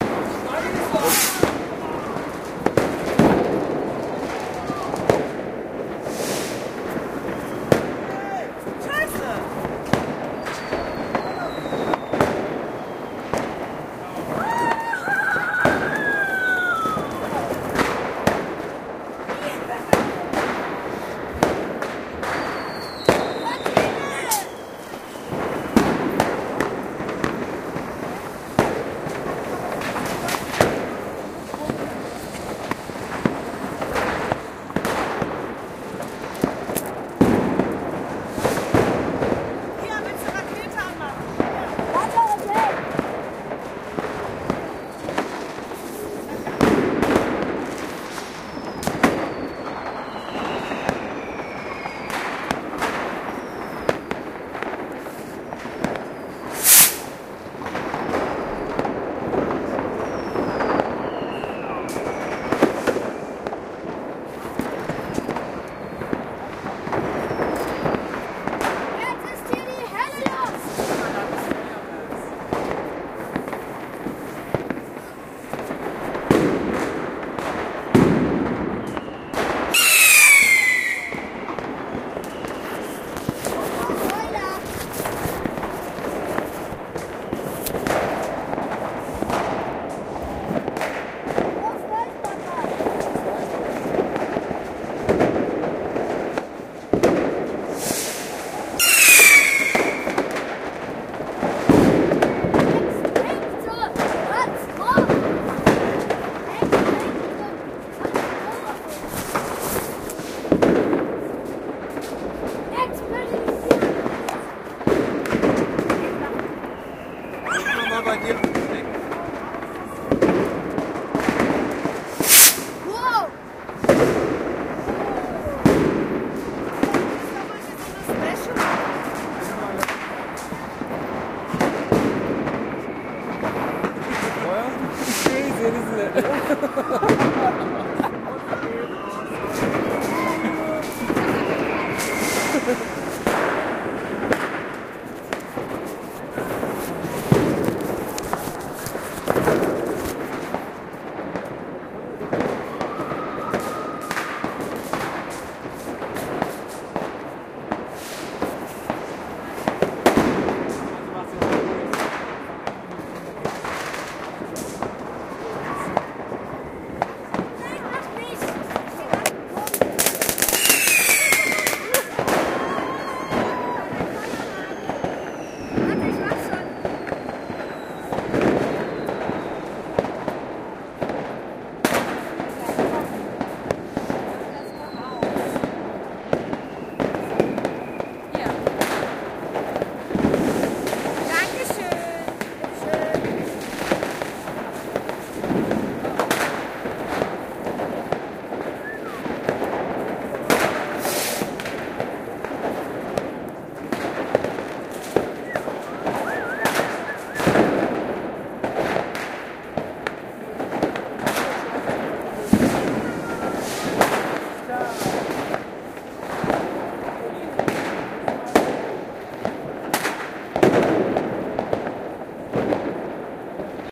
Happy New Year!
Midnight recording from the streets of Neukölln, Berlin, on New Years Day 2009. In Germany, this is the one day of the year when members of the general public are allowed to set off fireworks. Echoes of bombs and fusillades ring out across the city, while clouds of smoke tumble menacingly through the streets. Close by, a peaceful child sets off sparklers, and is astonished by the display. In the morning, the streets are littered with their remnants -- charred bits of paper, streaks of red ash.
Recorded with an Edirol R-09.
From a contemporaneous news report:
"Clashes in three German cities marred New Year celebrations, with police facing their toughest challenge from a crowd of mostly young revellers in the capital Berlin in the small hours of yesterday.
One small breakaway group from that crowd, which numbered 1,000 at the peak, tried to fire a skyrocket inside a police station and invade it, police spokesman Frank Millert said.